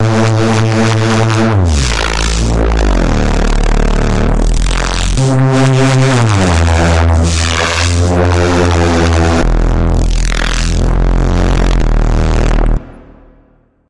Dark heavy distorted reese for drum and bass or dark music. Notch filter distortion :D.
bass, reese, distortion, notch, dark, drum, synth, hard